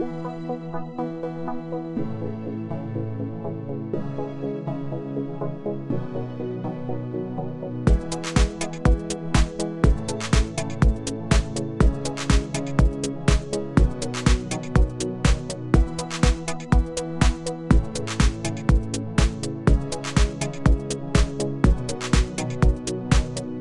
SYNTH LOOP
electro, loop, techno